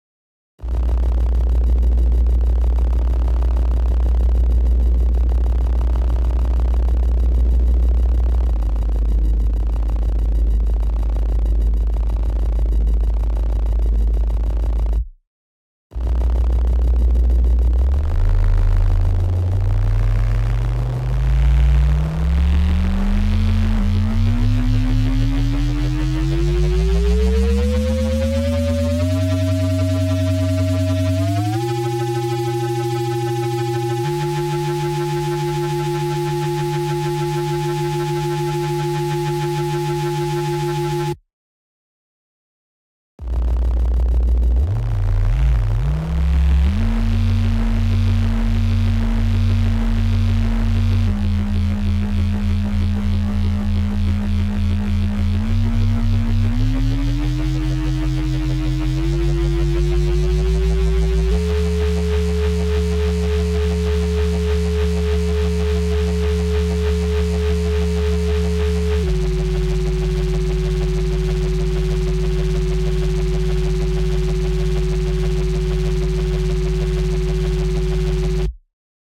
Sci-Fi Engine Car Drone Helicopter Spaceship
Artificial synthesized sound of sci-fi engine (car, helicopter, drone, quadcopter, spaceship). Different speed (gear).